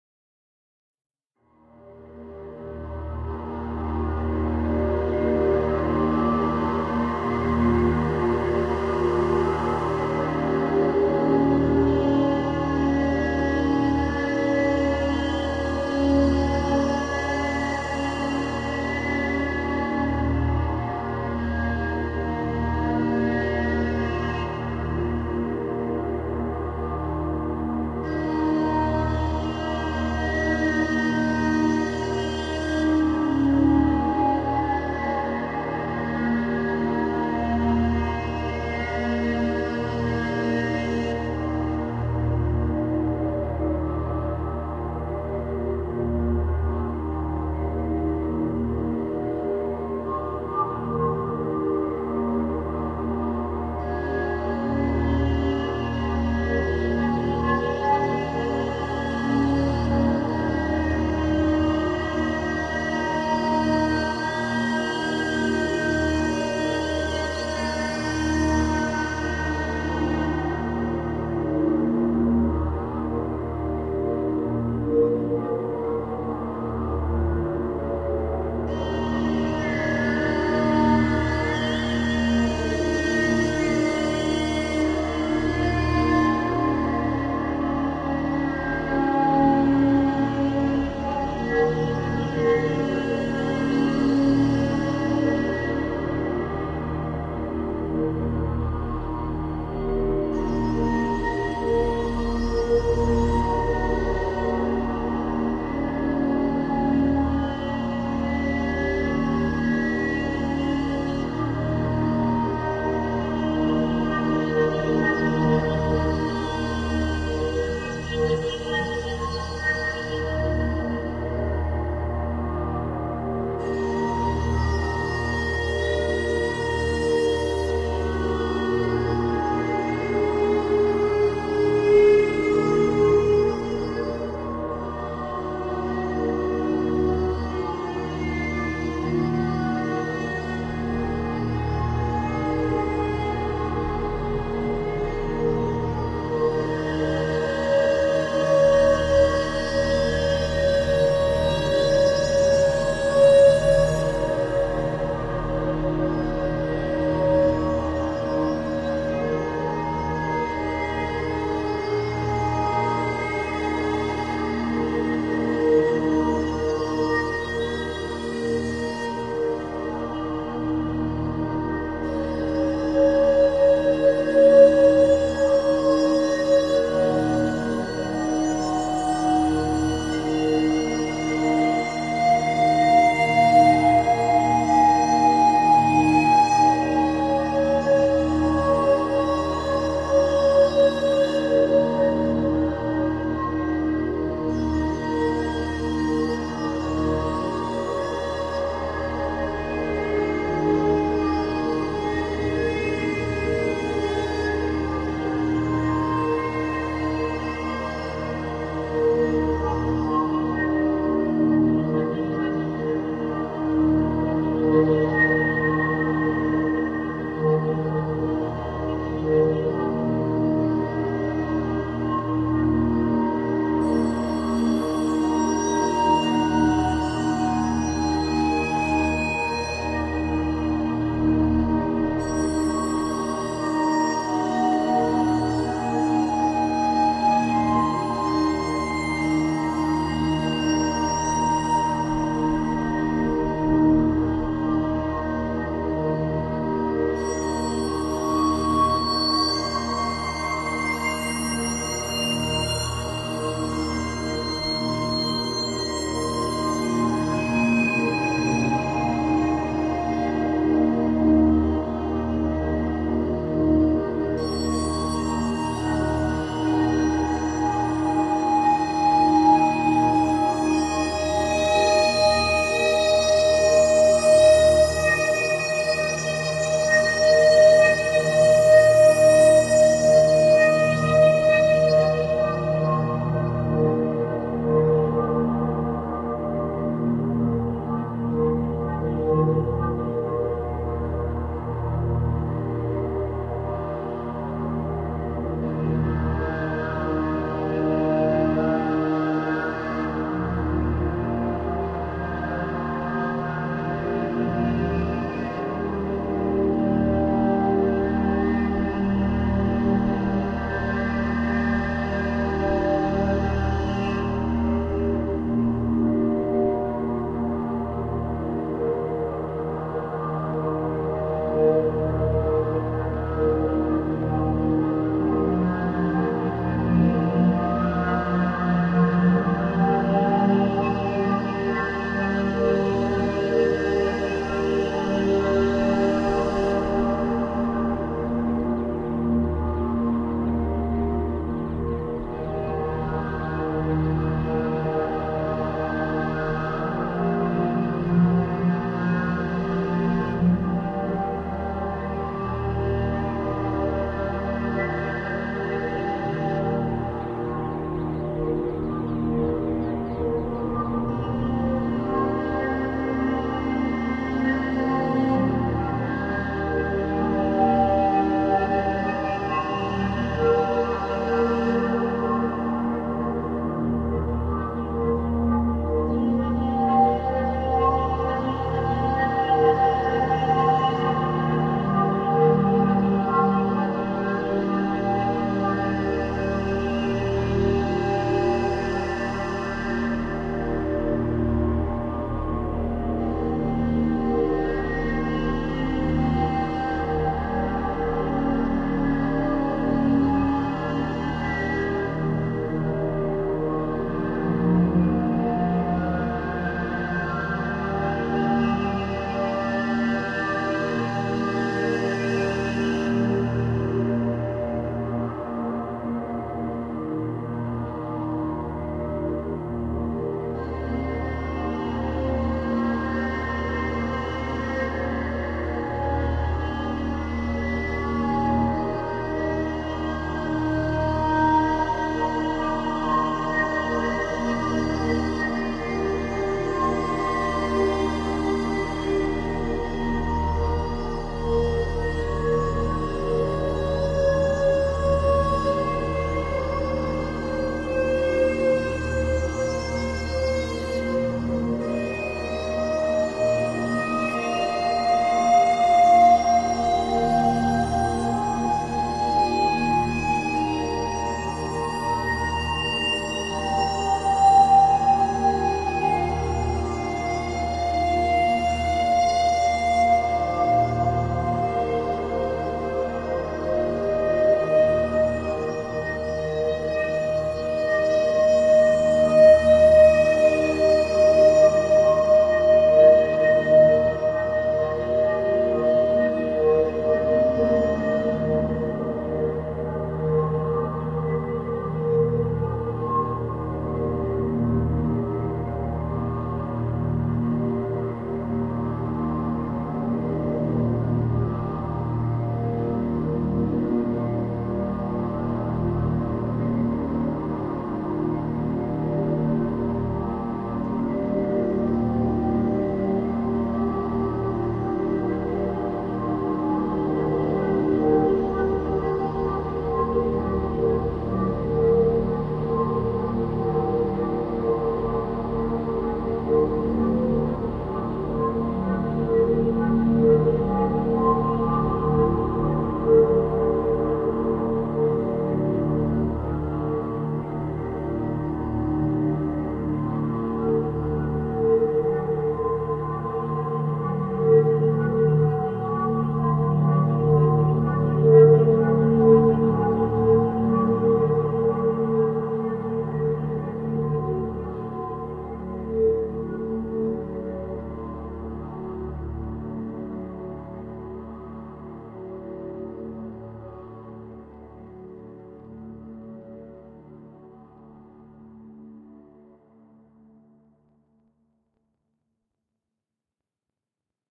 Synth creature or something
oooo
acousmatic electronic experimental film sound-design
ambience, creature, synth, synths, trance, electronic